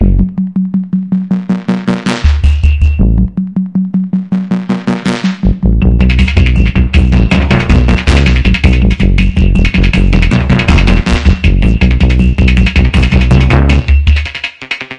operator fm synth in ableton making funky rhythms under nice pulse wave bass from dave smith mopho